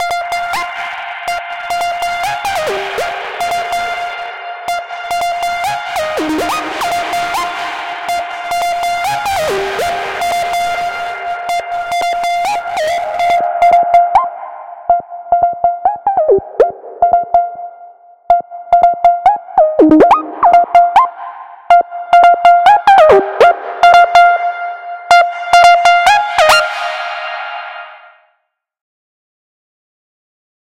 A distorted synth melody created with the Helm freeware synthesizer and fabfilter's Saturn distortion unit